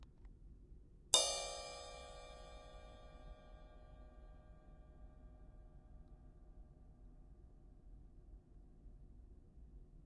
A cymbal recorded in my house with a field recorder